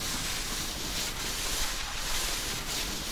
Drag Metal/Wood/Concrete Loop
concrete, drag, loop, metal, wood